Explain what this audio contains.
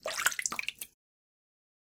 Small Pour 003
aqua, Dripping, Running, Run, blop, Sea, pouring, Lake, River, Movie, marine, Water, bloop, Splash, aquatic, Game, wave, Drip, pour, Slap, Wet, crash